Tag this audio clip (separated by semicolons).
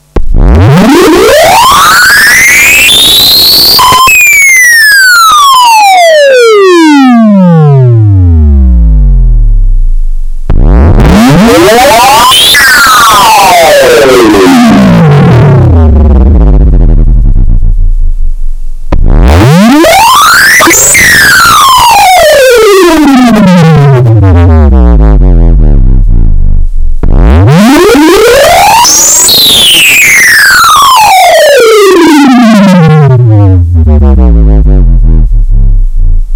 noise; filter; distorted-sweep; deep